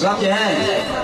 This sound belongs to a sample pack that contains all the sounds I used to make my Vietnam mix. (I'll post more info and a link on the forum.) These sounds were recorded during a trip through Vietnam from south to north in August 2006. All these sounds were recorded with a Sony MX20 voice recorder, so the initial quality was quite low. All sounds were processed afterwards. All sounds were processed afterwards. This sound was recorded during a terrible boat trip in Nha Trang.